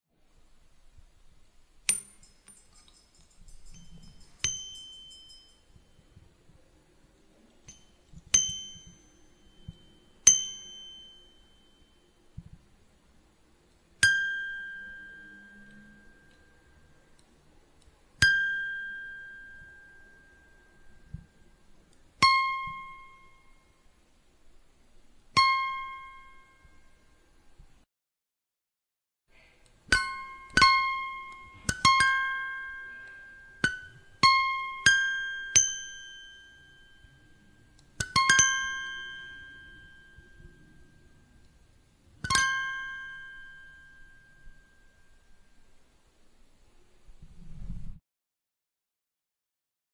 Sounds taken from an arp with hard strings and a piece of metal placed on the instrument.